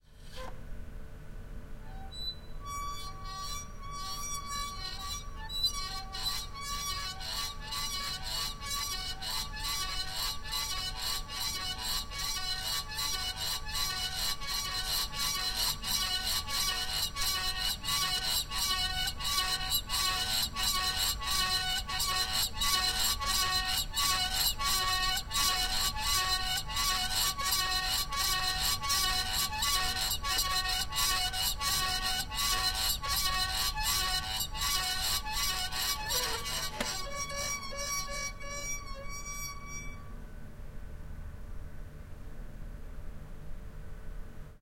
Squeaking ventilator in a window
An old plastic ventilator ist being opened and closed. You hear the blades spinning from the incoming air.
Recorded in Genoa, Italy.
blowing, fieldrecording, squeeking, field, field-recording, wind, weird, air, air-conditioning, fan, blow, ventilator, ventilation, recording, noise, conditioning, squeaking, vent